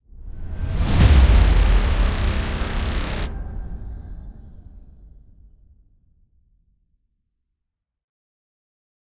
Cinematic Rise-and-Hit 04
Cinematic Rise-and-Hit sound.
cinematic, rise-and-hit